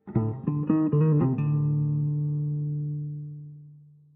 short guitar transitions blues unfinished
Recorded with Epiphone sheraton II pro into a Mixpre 6 via DI box, cleaned up and effects added.
riff, blues, electric, transition, note, resolution, end, tone, atmosphere, guitar